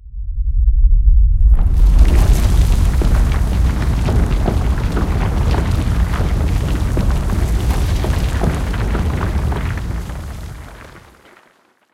The sound of an earthquake cracking open the soil.
Further samples of stones, wooden bricks, etc. recorded via Rode NT2A -> Focusrite Saffire Liquid 56.
Processed and mixed in Ableton Live 9.